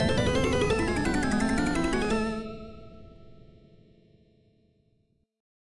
14-Fighter Destroyed...
This jingle, created with OpenMPT 1.25.04.00, is what I will also use in a custom game creation. The game is "Galaga Arrangement Resurrection." The jingle plays when you...*sigh*...destroy the captured fighter instead of defeating the Boss Galagan that caught it...
arcade, games, jingle, music, video-games